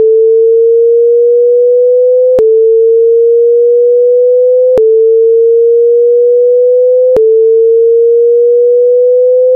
Version of Alarm Number One looped four times. See Alarm Number One.
Created with: Audacity
alarm, wailing